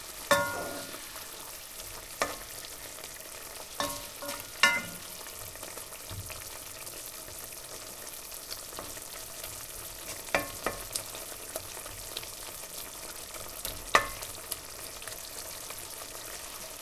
Skillet Cooking
Oil and garlic sizzling in a frying pan
cook, cooking, food, fry, frying, oil, pan, sizzle, sizzling